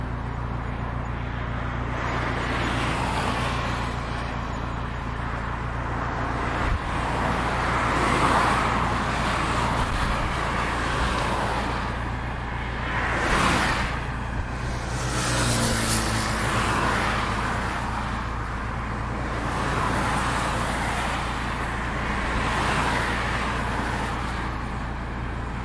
cars passing on busy avenue
cars, highway, traffic, road, street